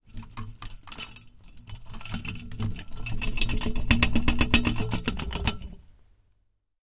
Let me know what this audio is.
Metal Glass Objects Rattling
Rattling some metallic objects, glass as well I think. Recorded in stereo with Zoom H4 and Rode NT4.
glass, iron, metal, metallic, rattle, rattling, shake, shaker, shaking